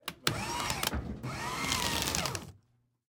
paper shredder false start bin full mechanical malfunction servo
servo, shredder, paper, malfunction